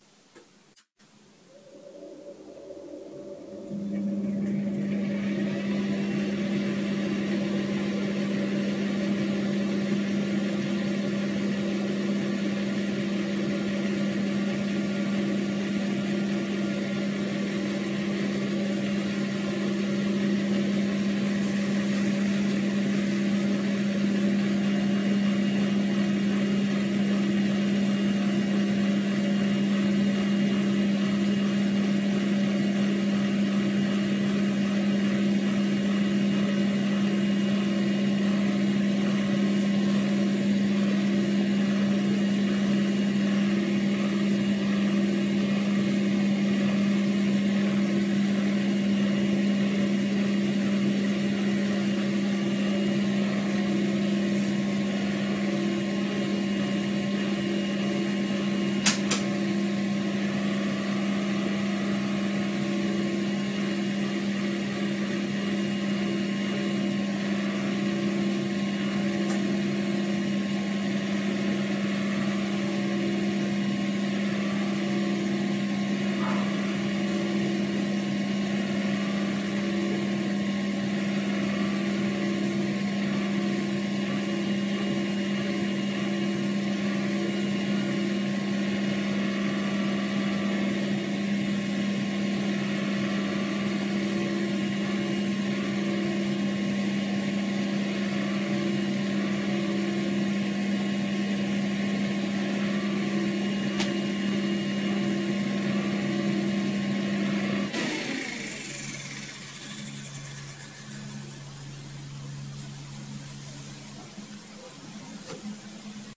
Fan Sound
A fan in a bathroom dont know what you could use this for
fan, just